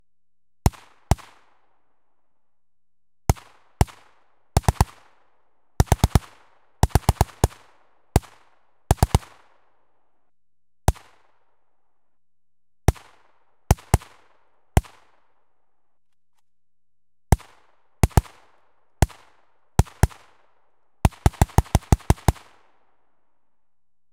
39 rounds fired from a short barrelled AK 47 into a snowy mountainside.
The shortened barrel gives the gun a louder, more powerful report. This doesn't really make the weapon any more effective (in fact, it does the opposite), but it sounds and looks cool.
This sound was recorded using a Tascam DR-40x. The only editing done to the sound is cutting out the silence in between reloading.